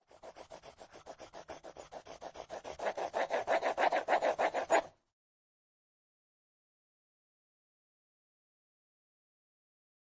15GGalasovaK crayon
This sound is a crayon that draws on paper.
color, pencil, wood